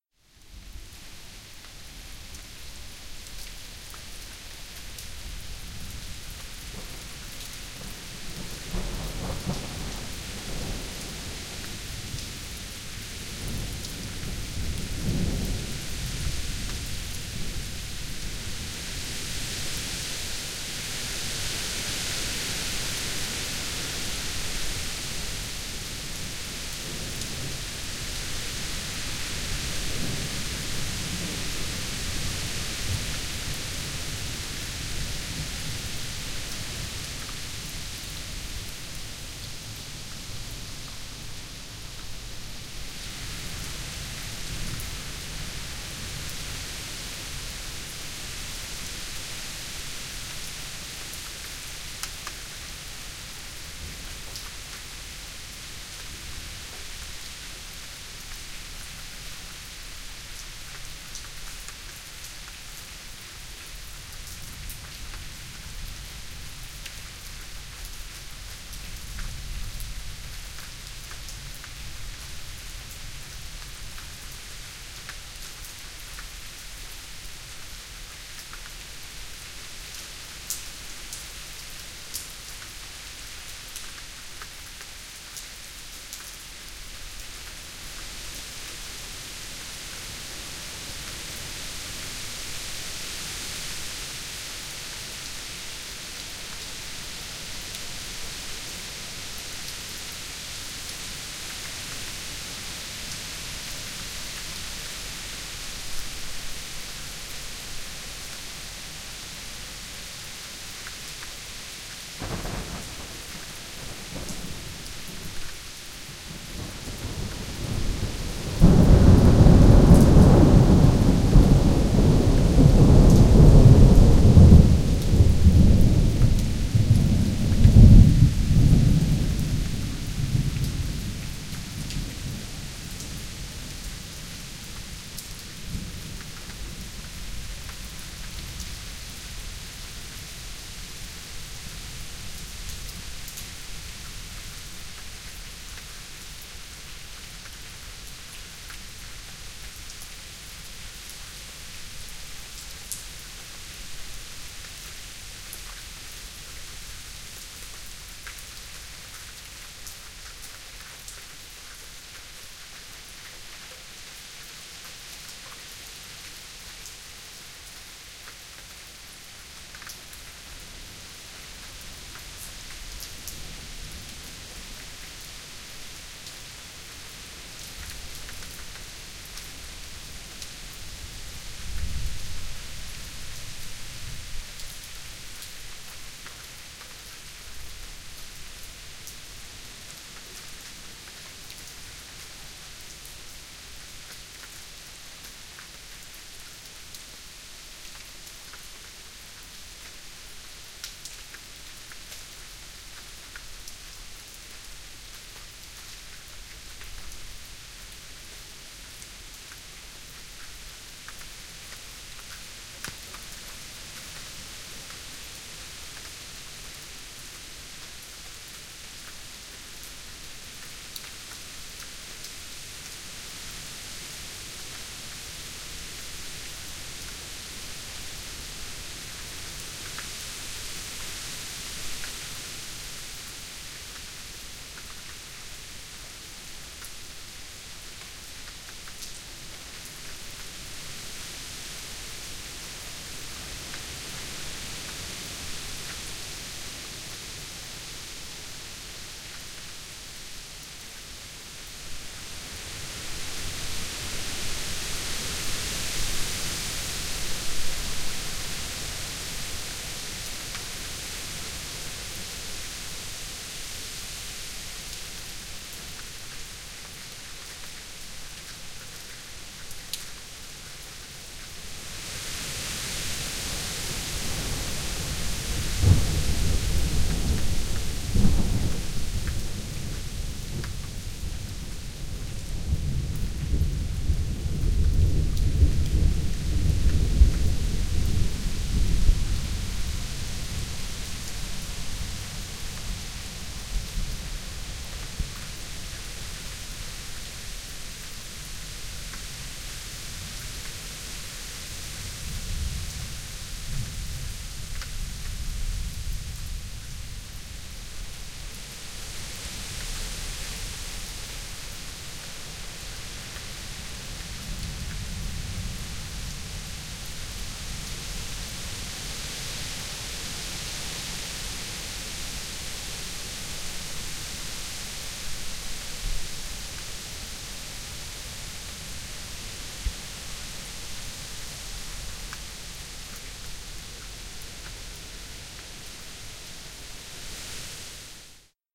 Suburb ambience, light rain, raindrops on concrete, heavy wind, thunders. Recording was made with 3DIO + Sound Devices Mix Pre 10 II.
ambience, lightning, nature, rain, raindrops, storm, suburb, thunder, trees, weather, wind